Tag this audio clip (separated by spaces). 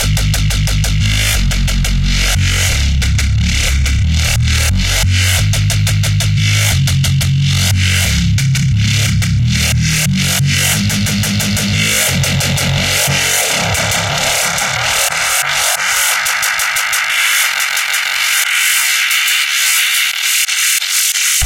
Dream Vocals Melodic Fast Heavy Lead Pad Drums 179BPM Drum Rythem Loop Bass DnB DrumNBass DrumAndBass dvizion Synth Beat Vocal